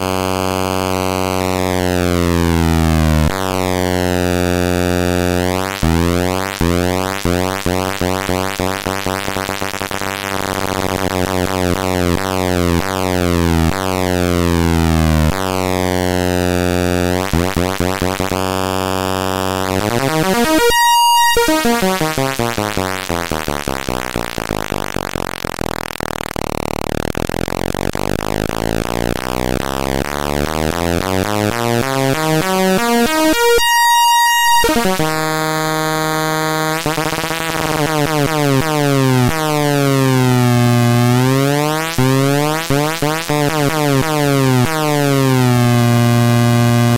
A sample recording from a freshly built Atari Punk Console.